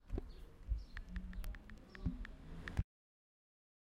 typing iphone
Typing a message on iphone
iphone, message, mobile, phone, typing